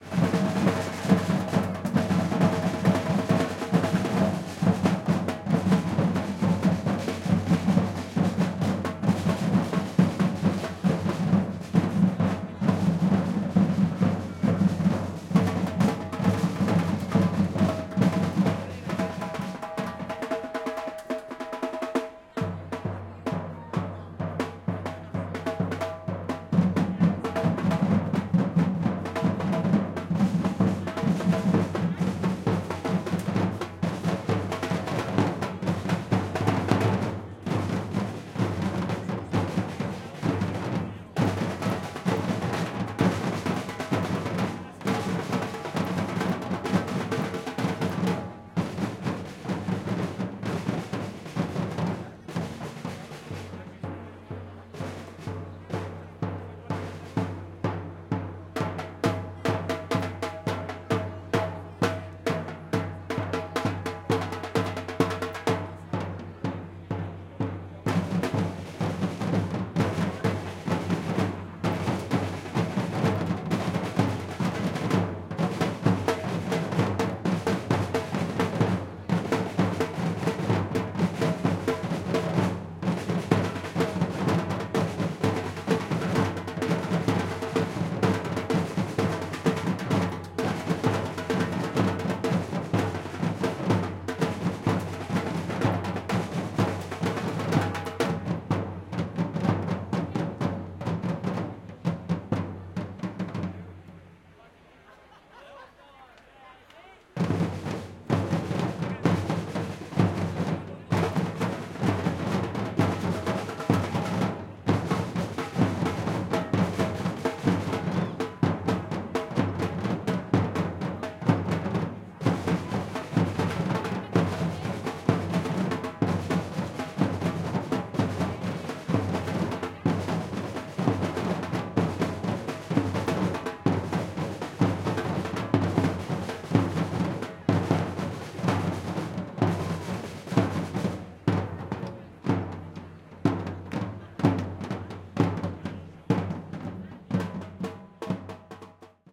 batucada close distance barcelona 11 setembre crowd people on background